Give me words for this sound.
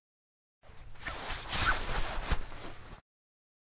Luggage Laptop Bag Foley Handling 2
So you're needing to move your laptop back, or pick it up put it down or scoot it...well it sounds something like this....
Recorded with my Zoom H6.
Couldn't find anything online like this so here you go!
laptop-bag, foley